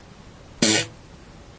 fart poot gas flatulence flatulation
flatulence, fart, flatulation, poot